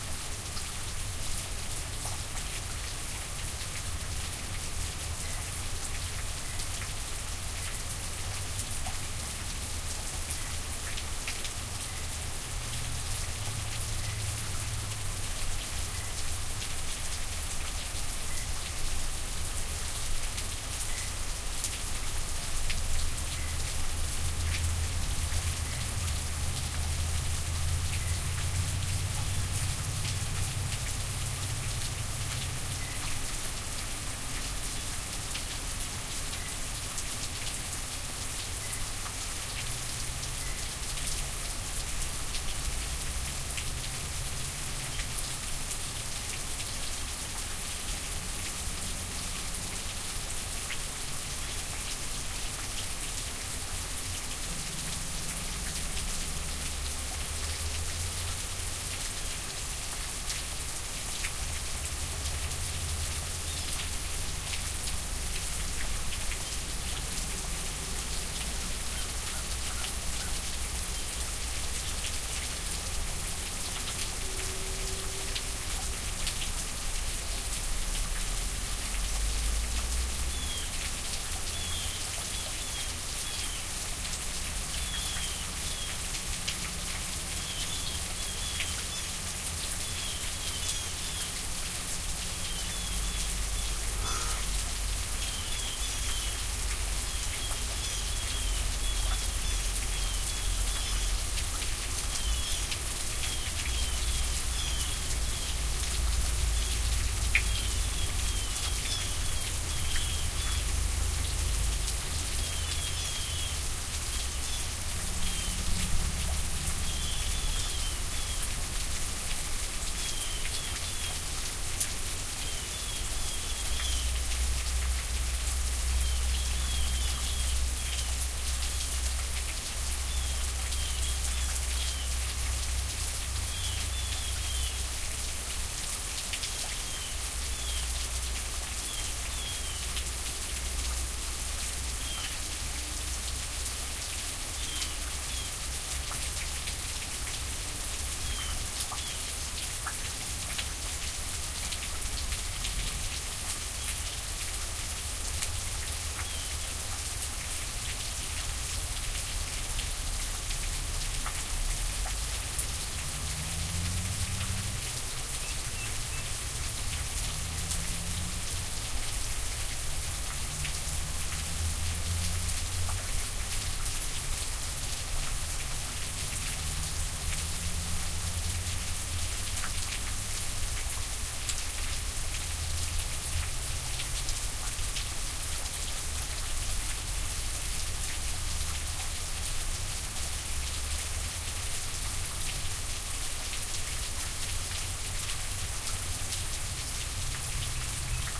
Rainy Day with Various Birds
Here is a rainy day version with what sounds like blue jays and other birds including crows in the background. Recorded on the back porch with rain falling off eaves in a spectacular sound clip via a Tascam DR-05.
blue, crows, day, eaves, jays, porch, rainy